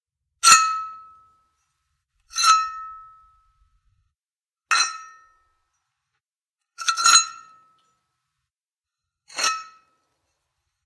metal rustle clank
Various metal rustles and clanks.
Recorded with Oktava-102 microphone and Behringer UB1202 mixer desk.